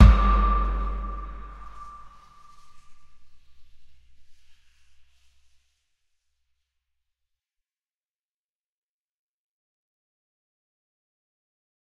Slam Kick 1
Impact stinger in Logic Pro X. Layered drums (Kick, 808, Bodhran, some others), metallic pipe hits, Compression and effects processing.
Imaging, Stinger, Radio